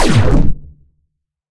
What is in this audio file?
Heavy Anti Air Blaster
Gun, videgame, Pulse, Rifle, Heavy, Fire, Pew, Machine, Loud, Laser, Shot, Gunshot, Shoot, Rikochet, SciFi, Blaster, Light, Bang